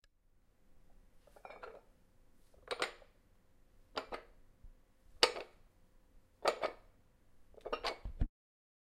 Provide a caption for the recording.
weight lifting machine
gym, lifting